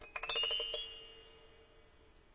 Fretless Zither "pin-drop" Gliss 2
A gliss using the small ends of the wires on a fretless zither where they meet the tuning pegs. Rather strange sound.
fretless-zither, glissando, pluck, zither